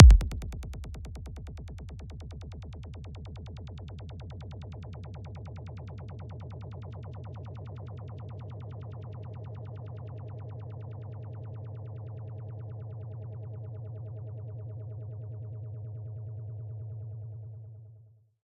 kic2 delay loop xp